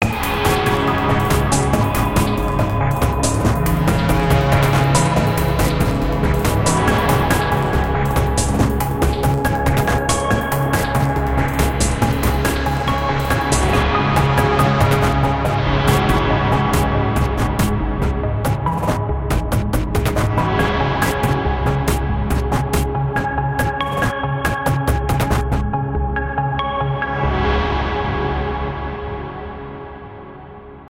technology ambience
Synthesizer ambience with glitchy electronic sounds as rhythm. I used mostly Nexus VST and lots of freeware ambient effects in the mix. Old file from 2011 I discovered in my files :-)